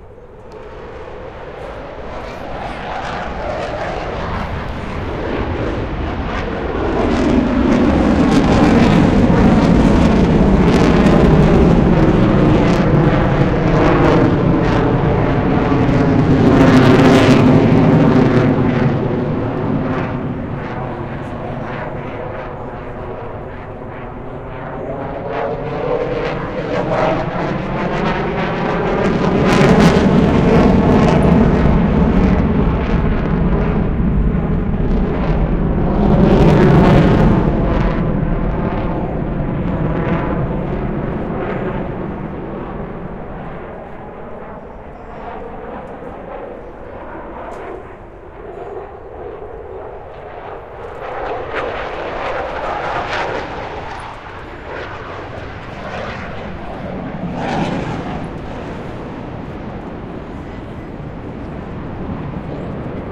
Recording of some aeroplanes flying over a park in an exhibition flight. Location: Diagonal-Mar park; Barcelona (sep-30-2007)
aeroplane, exhibition, field-recording, flight